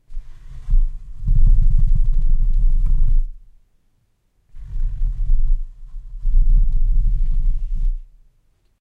rustle.box-growl 10
recordings of various rustling sounds with a stereo Audio Technica 853A
bass, box, cardboard, deep, growl, low, rustle